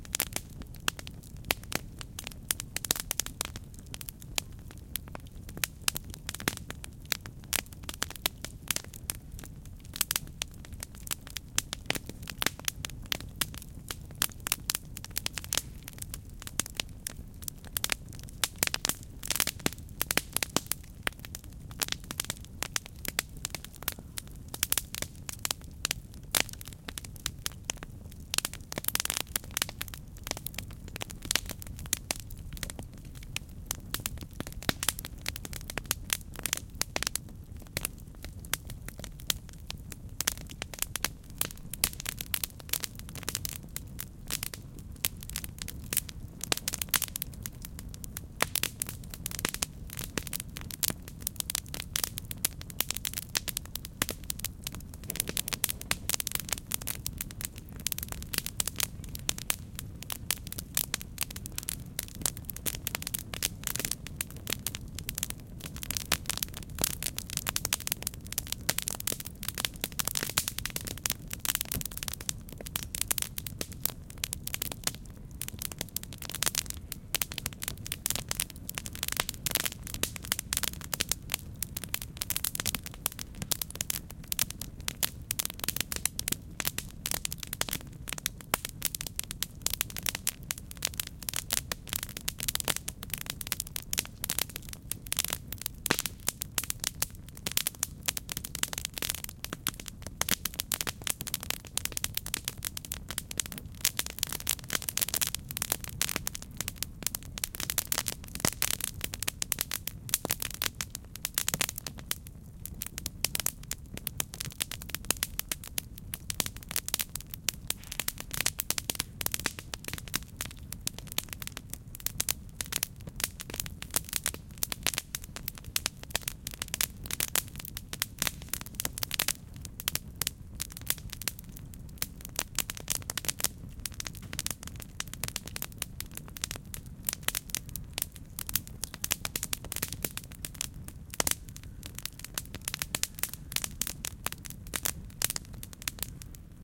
campfire medium slight forest slap echo
medium, forest